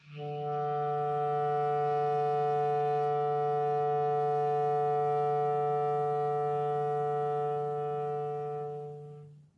One-shot from Versilian Studios Chamber Orchestra 2: Community Edition sampling project.
Instrument family: Woodwinds
Instrument: Clarinet
Articulation: long sustain
Note: D3
Midi note: 50
Midi velocity (center): 2141
Room type: Large Auditorium
Microphone: 2x Rode NT1-A spaced pair, 1 Royer R-101 close, 2x SDC's XY Far
Performer: Dean Coutsouridis
long-sustain, clarinet, d3, woodwinds, midi-velocity-62, midi-note-50, vsco-2, single-note, multisample